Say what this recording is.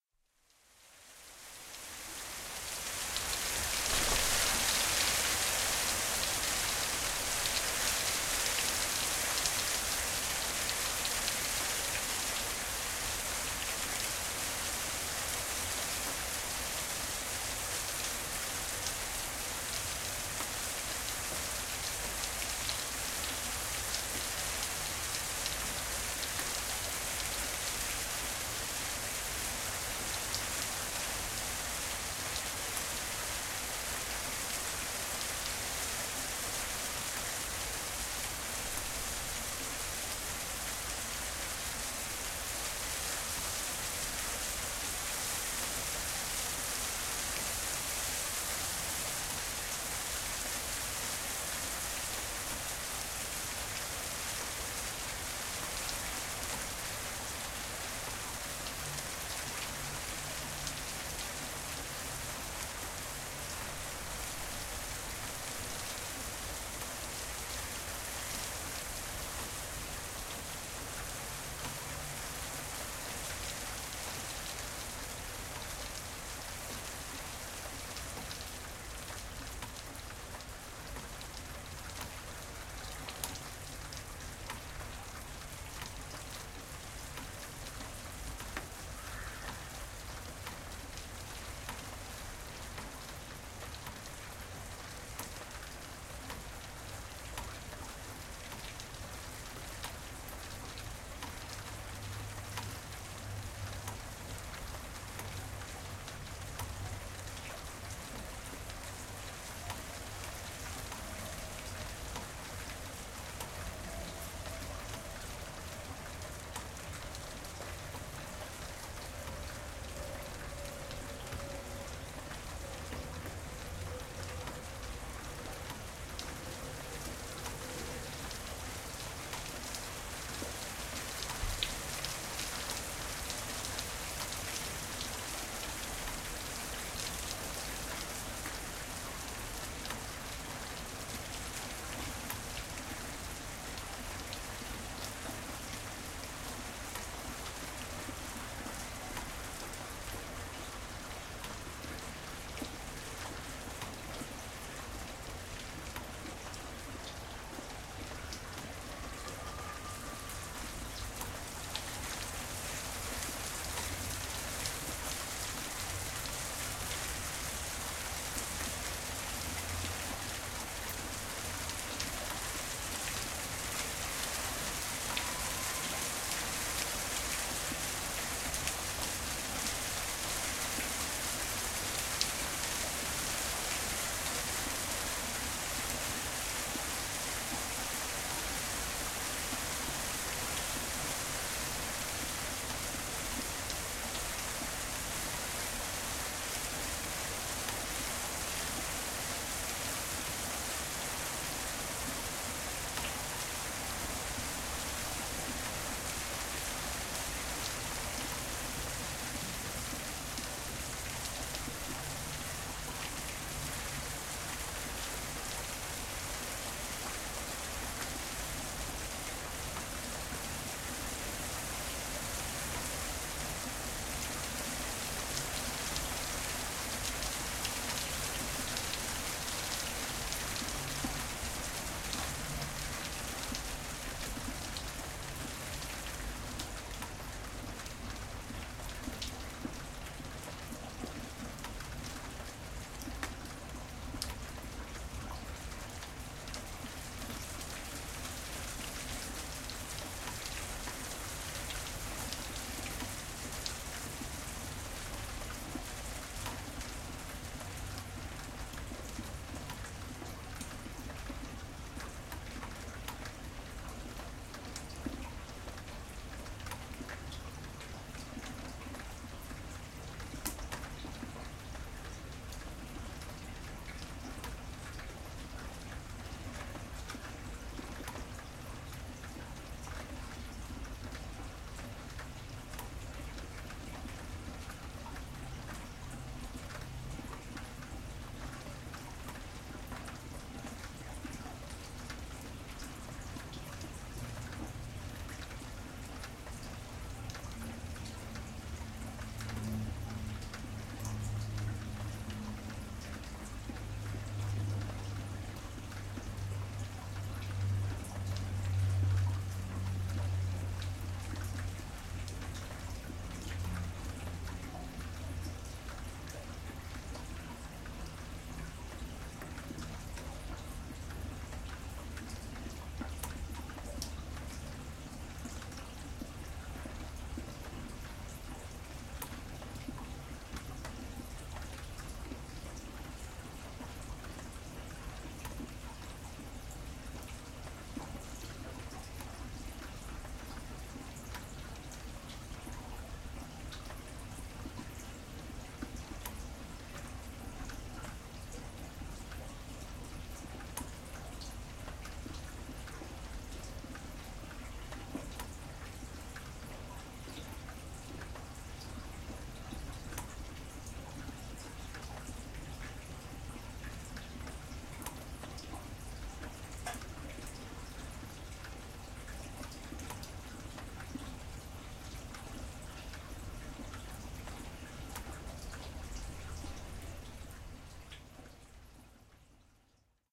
Hail changing to rain on a roof terrace recorded with an Olympus LS-11.